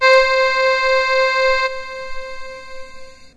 Old realistic concertmate soundbanks. Mic recorded. The filename designates the sound number on the actual keyboard.
concertmate, keyboard, lofi, radioshack, realistic, samples